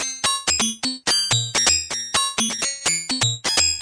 A kind of loop or something like, recorded from broken Medeli M30 synth, warped in Ableton.
motion, lo-fi, loop